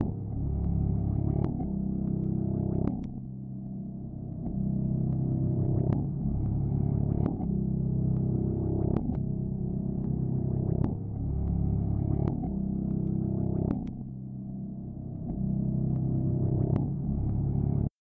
Guitar loop reverse 3
I made this using the looper on the POD. Just loud no effects except distortion and chorus.
loud, growl, guitar, reversed, looper, electric-guitar